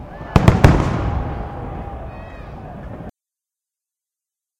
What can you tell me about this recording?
recording of a firework explosion with some distant crowd cheering and applausing